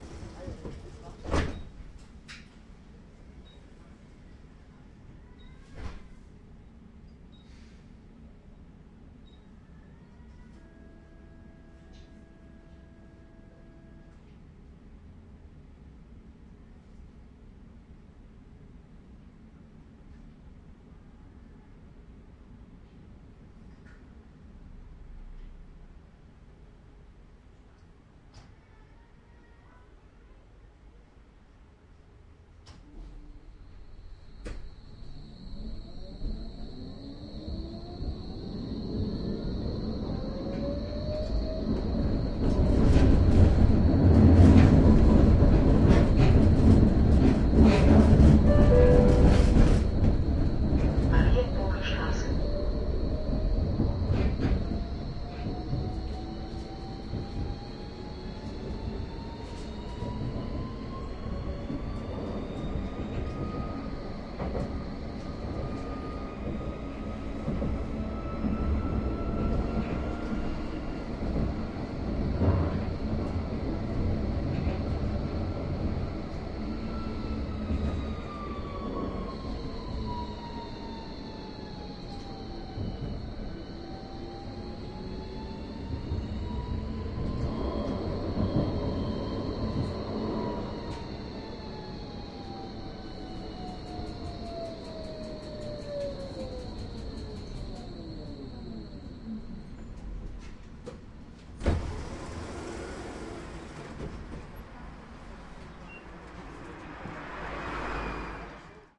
Driving the Tram
Driving one station with the Berlin Tram.
Recorded with Zoom H2. Edited with Audacity.
announcement, urban, berlin, bvg, germany, speed, driving, transportation, cart, capital, tram, train, station, voice, drive, street-car, city, announcer